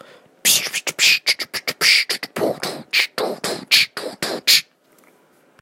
Just a voiced drum pattern I did while testing a microphone. It came out better than I expected.
If you can find a use for it, go nuts.